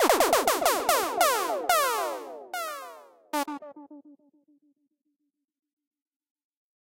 Dub Siren effect. Useful in Dub, Trap, Dubstep, any EDM.